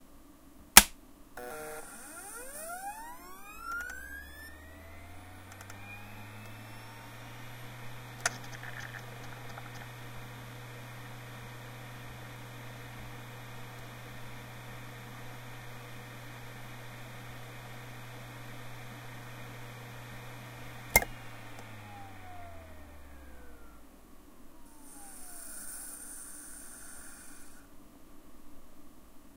Hard Drive start/ide/stop
Sound of a external hard drive spinning up then idling for a moment and then shutting down.
No processing has been applied.
disk, hard-drive, hdd, motor, spin, whirr